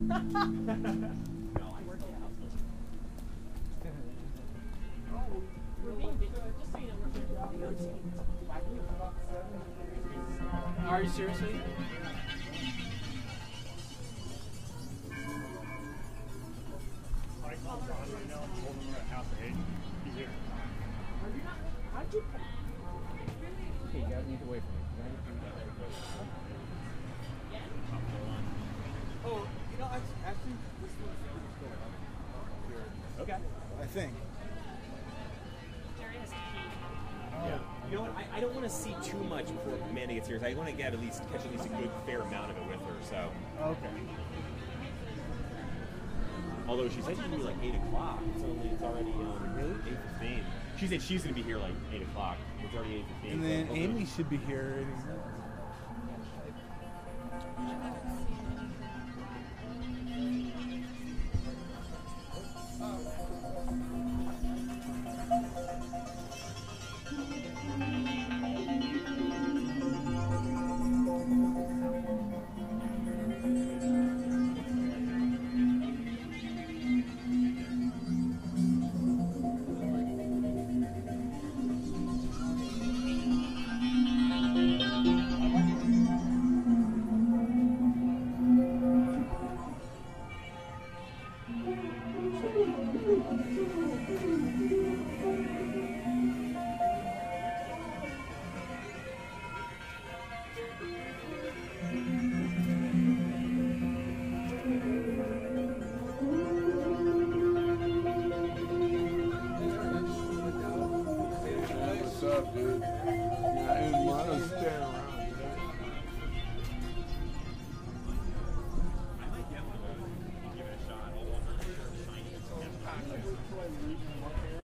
This is a part of a set of 17 recordings that document SoundWalk 2007, an Audio Art Installation in Long Beach, California. Part of the beauty of the SoundWalk was how the sounds from the pieces merged with the sounds of the city: chatter, traffic, etc. This section of the recording features pieces by: Phillip Curtis; Charles Erwin
california, sound-art, long-beach, soundwalk-2007, sound-installation